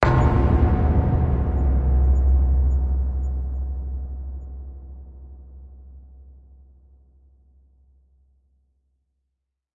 Hells Bell Hit2
The ringing of hell's bells. Please write in the comments where you used this sound. Thanks!
sinister, iron, terror, nightmare, creepy, leaf, Gong, spooky, thrill, metal, ringing, bell, horror, scary, macabre, ghost, fear, witchcraft, suspense, mystery, drama, Blows, haunted, threat, terrifying, phantom, hell